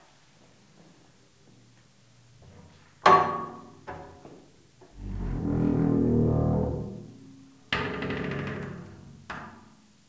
Iron door opens
Sound of a iron door of a blast shelter opening.
door, metal